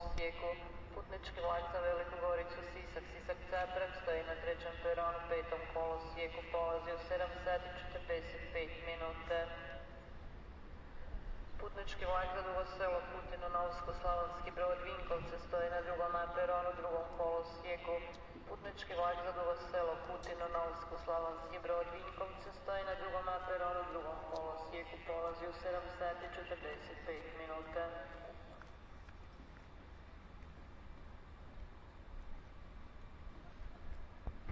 Zagreb Train Station Announcement
Zoom H1 Zagreb Train station morning commuter trains